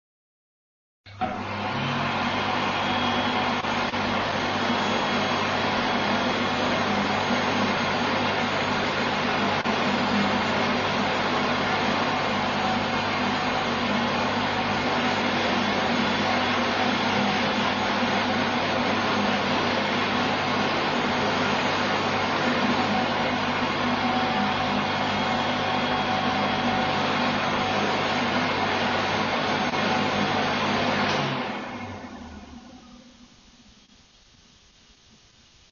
Hand drier, yep that’s it.
wind, air, Hand, drier, noise